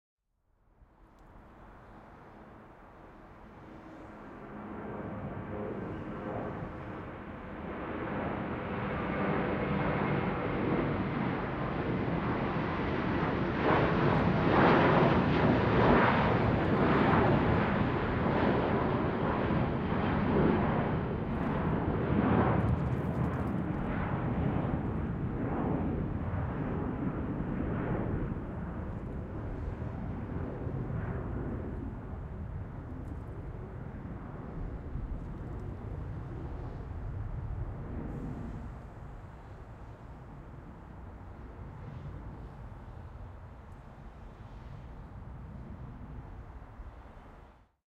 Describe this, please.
Audio of a large Boeing aircraft flying from right to left at London Gatwick airport. Recorded from the roof of a car park.
An example of how you might credit is by putting this in the description/credits:
The sound was recorded using a "Zoom H6 (XY) recorder" on 29th April 2018.